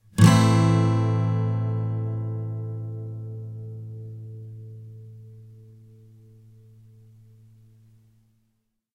Yamaha acoustic guitar strum with medium metal pick. Barely processed in Cool Edit 96. First batch of A chords. Filename indicates chord.